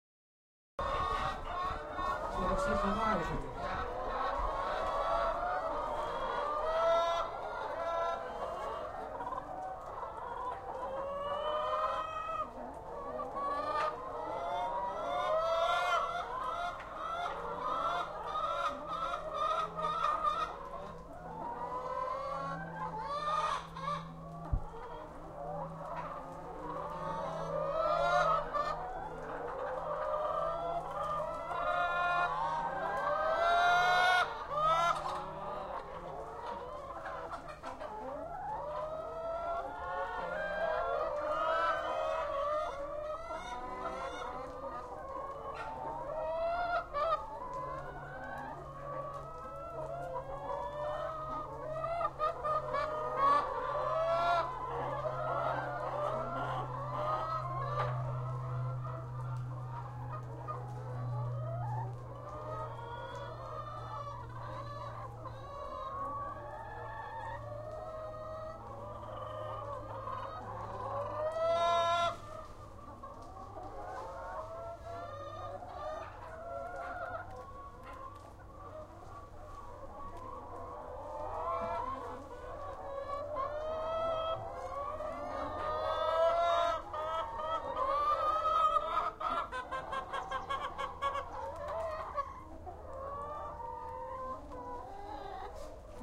KE4966QX
farm, chicken